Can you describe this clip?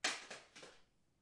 throw paper 2012-1-4
The sound of wrapping paper hitting the floor, after i threw it. Zoom H2.
Dare-9, hit, paper, throw, throwing, wrapping-paper, Zoom-H2